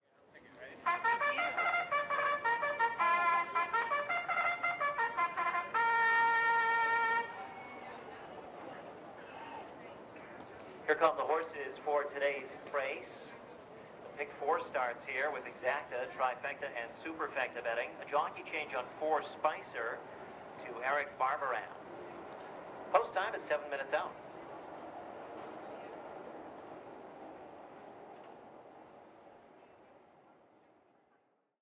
Call to Post
Recorded prior to the 7th race at Suffolk Downs, East Boston, MA on 9.22.12
Yamaha P51 on to Sony TCM-200DV Cassette Tape
Race, Field-Recording, Trumpet, Call-to-post, Horse